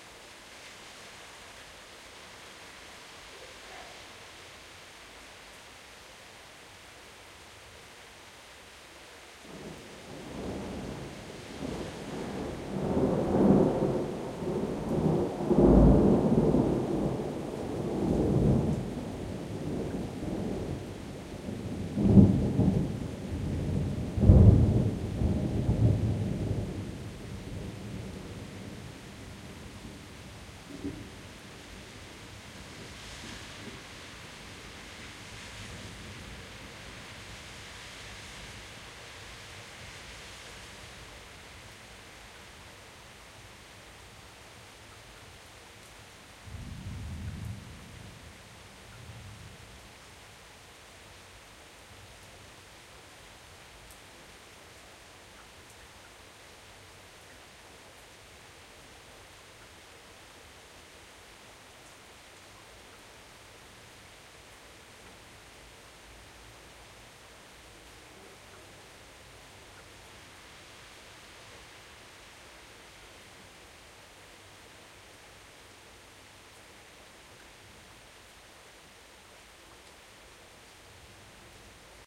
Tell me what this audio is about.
Thunderclap during a rainy summer thunderstorm at July,3rd 2008 in the city of Cologne, Germany. Sony ECM-MS907, Marantz PMD671.
rain, thunder, thunderclap, thunderstorm